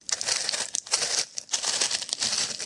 mco walk f01
Footsteps...
NOTE:
These are no field recordings but HANDMADE walking sounds in different speeds and manners intended for game creation. Most of them you can loop. They are recorded as dry as possible so you should add the ambience you like.
HOW TO MAKE THESE:
1. First empty two bottles of the famous spanish brandy Lepanto.
2. Keep the korks - they have a very special sound different from the korks of wine bottles.
3. Then, if you're still able to hit (maybe you shouldn't drink the brandy alone and at once), fill things in a flat bowl or a plate - f. e. pepper grains or salt.
4. Step the korks in the bowl and record it. You may also - as I did - step the korks on other things like a ventilator.
5. Compress the sounds hard but limit them to -4 db (as they sound not naturally if they are to loud).
floor, footsteps, ground, steps, walking, walks